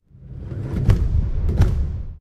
Two large drum strikes with short interval and ambience, suitable for film, film score, trailer and musical tracks.
Made by closing a car door in an empty underground parking, with some eq and dynamics processing.